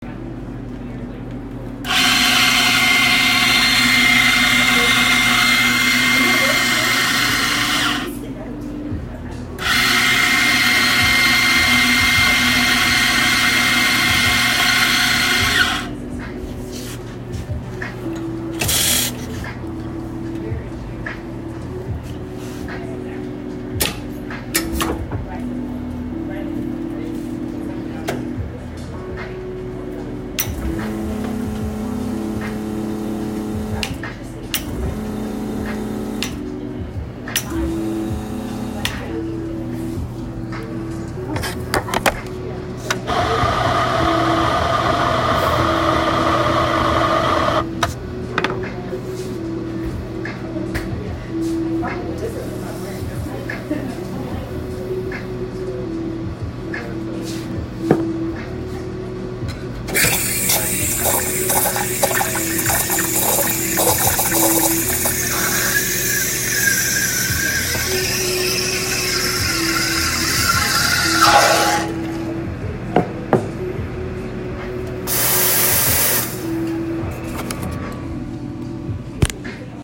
Espresso Machines
These are the noises of a coffee grinder followed by an espresso machine.